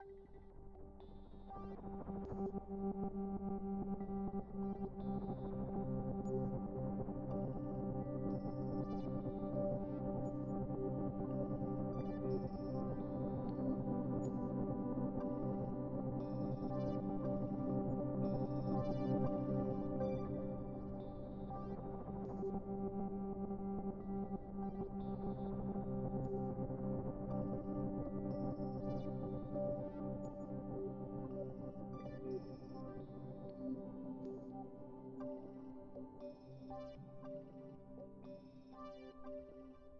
Soft Atmosphere
From a collection of sounds created for a demo video game assignment.
Created with Ableton Live 9
Absynth
Recording:Zoom H4N Digital Recorder
Bogotá - Colombia
atmosphere, electronic, Video-game